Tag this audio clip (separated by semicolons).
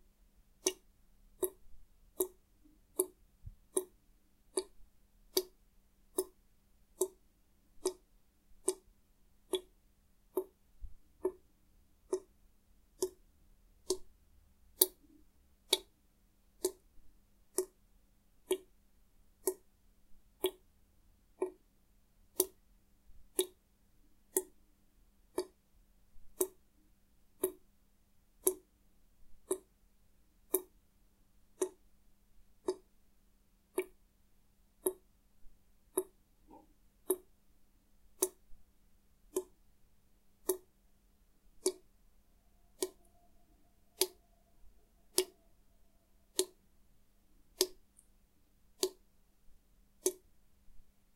bathroom; drip; drop; faucet; sink; water